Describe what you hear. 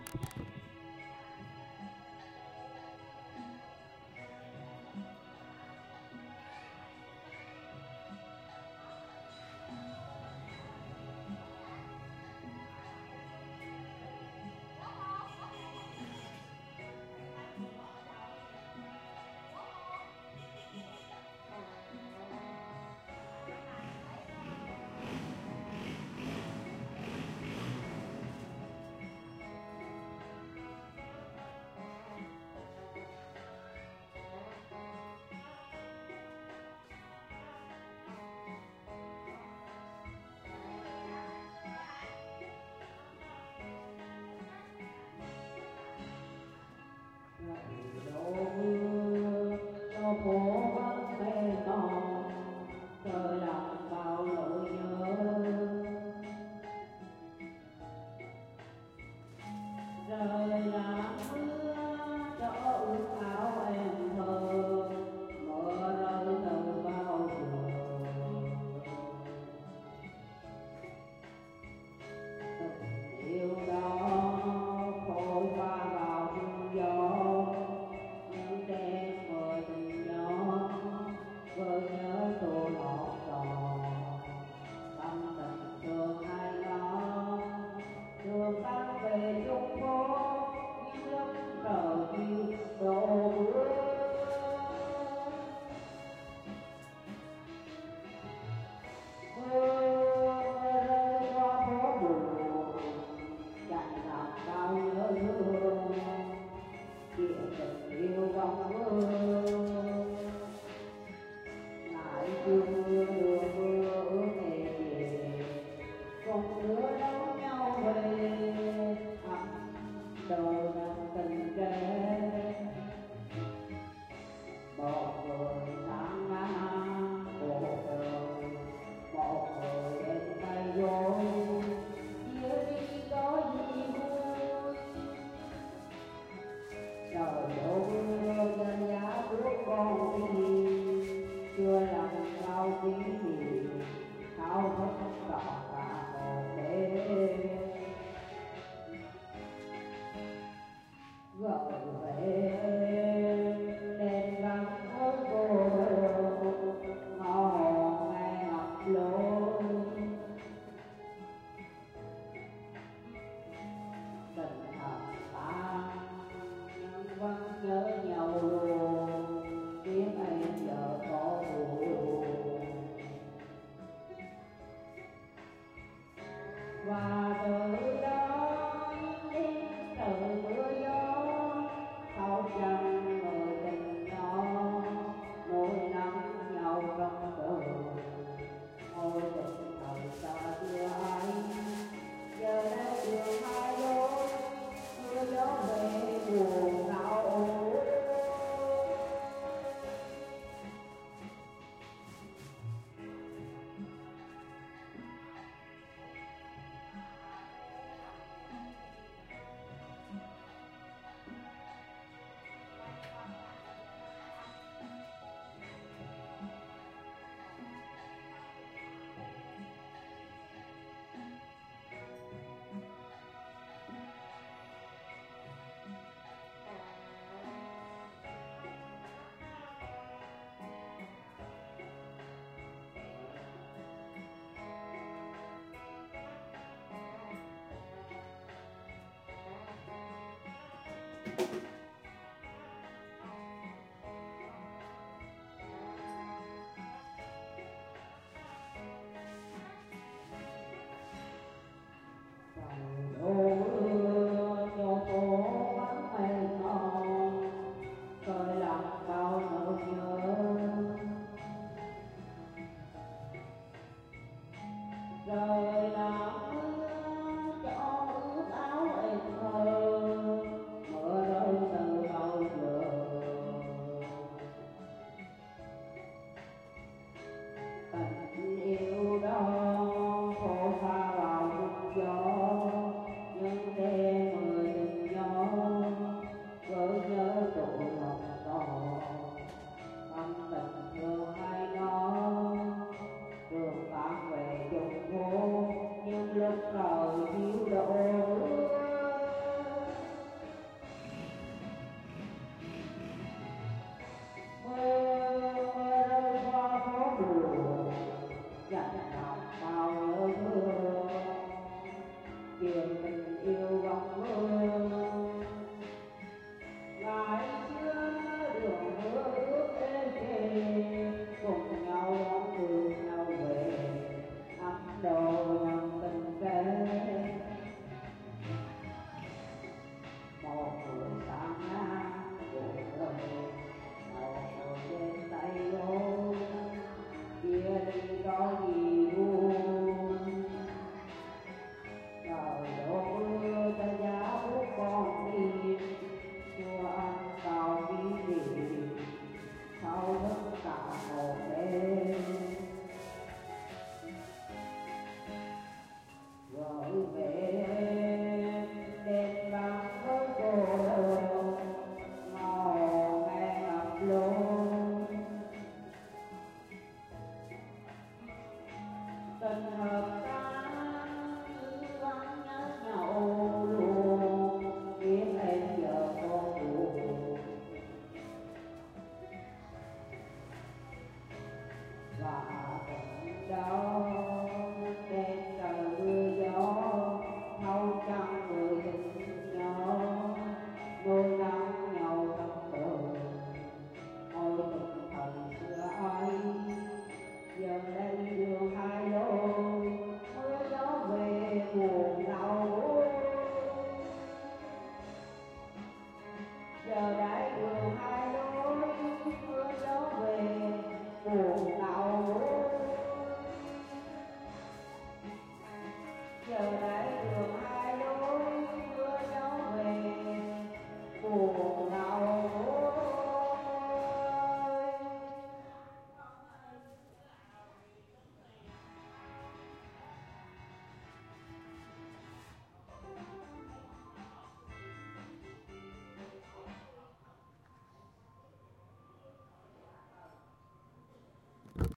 Vietnamese Karaoke
10am somewhere in Vietnam. A male voice singing really off-key karaoke, probably a love song.
10am, courtyard, dirty, field, house, karaoke, live, lo-fi, love, midi, off-key, patio, punk, recording, singer, singing, vietnam, vietnamese, worst